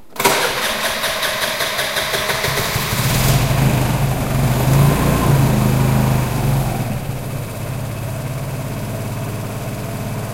vespa scooter startup in the garage